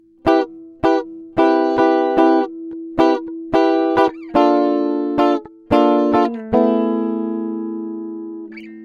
guitar chord rhytam 1
Improvised samples from home session..
pattern, licks, acid, guitar, groovie, fusion, jazz, jazzy, funk